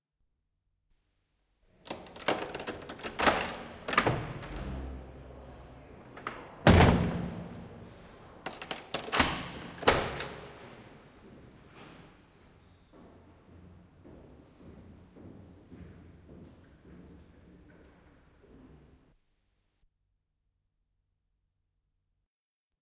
Prison cell door open and close // Vankikopin ovi auki ja kiinni
Prison cell door open and close, fading footsteps in the corridor.
Vankikopin ovi auki ja kiinni, etääntyvät askeleet käytävässä.
Paikka/Place: Suomi / Finland / Helsinki, keskusvankila / Central prison
Aika/Date: 23.08.1978
Kiinni, Yleisradio, Field-Recording, Door, Lock, Vankila, Yle, Open, Selli, Auki, Ovi, Finnish-Broadcasting-Company, Cell, Finland, Jail, Close, Prison